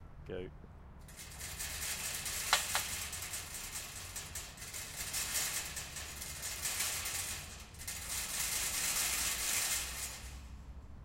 Fence Rattle 2 Front
Rattling a section of chain link fence.
Chain, Fence, Link, Rattle, Shake